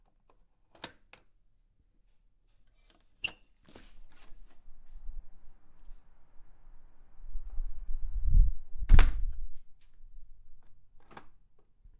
Opening:ClosingDoor
Recorded on a Zoom H6, door opening and closing, lock sound included.
Door, H6, Lock